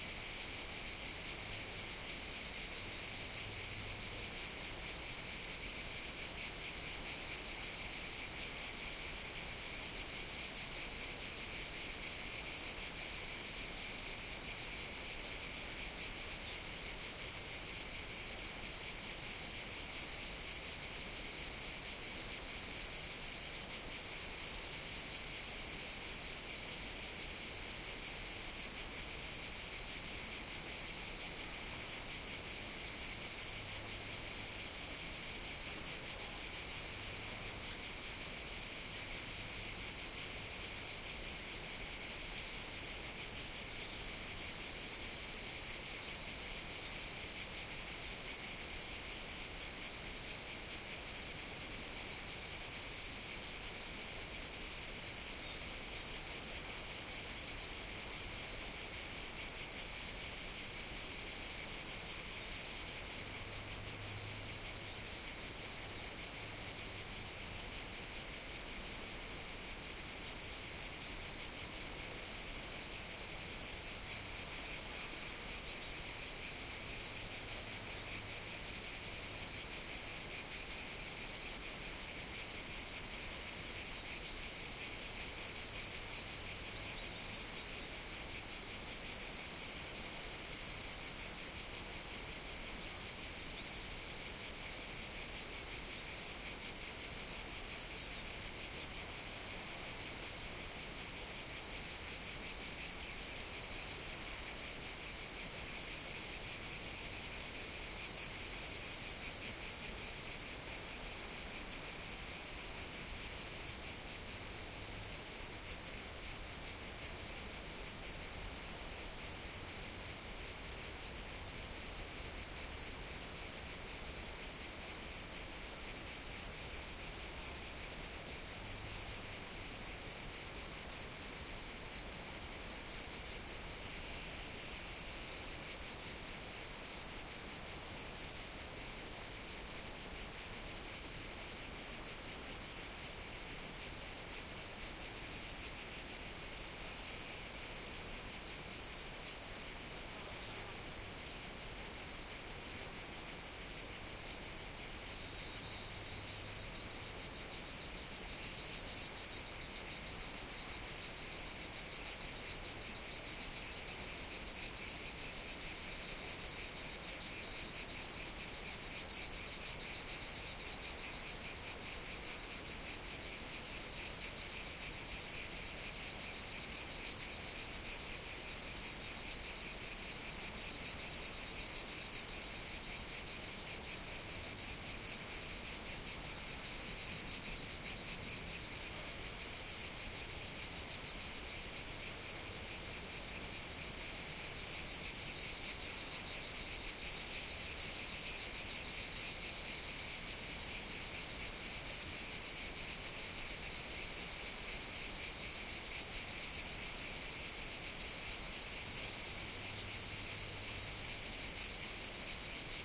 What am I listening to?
Cicadas, Cricket (Euboea, Greece)
Cicadas and Cricket at close distance
Insects, Cricket